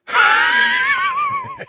Pure unadulterated wheeze.
Air, Breath, Breathe, Breathing, Heat-Attack, Hot, Man-Wheezing, Wheeze, Wind, Winded